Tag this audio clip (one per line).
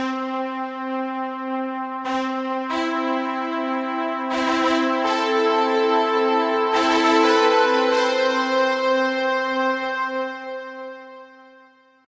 build-up
fanfare
heroic
triumphant
trumpet